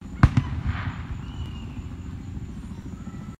a short firework boom with some ambient tone
explosion, boom, firework